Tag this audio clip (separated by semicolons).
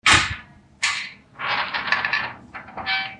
Creak; Door; Heavy; Metal; Open; Squeak; Unlock